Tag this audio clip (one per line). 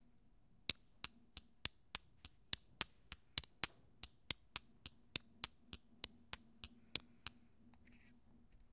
2014
doctor-puigvert
february
mysounds
sonsdebarcelona